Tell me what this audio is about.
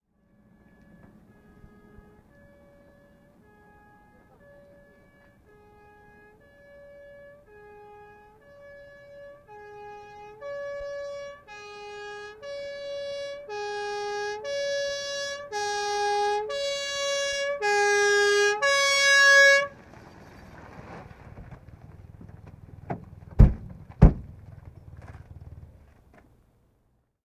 Poliisiauto, vanha, sireeni, hälytysajoneuvo, tulo / Emergency vehicle, old police car, hi-lo siren, approaching from far away, stopping, doors closed
Poliisiauto, hälytyssireeni, pii-paa (hi-lo), lähestyy kaukaa, pysähtyy, ovet.
Paikka/Place: Suomi / Finland / Helsinki
Aika/Date: 10.01.1959
Yleisradio, Sireeni, Poliisiauto, Siren, Finland, Police-car, Finnish-Broadcasting-Company, Tehosteet, Emergency-vehicle, Yle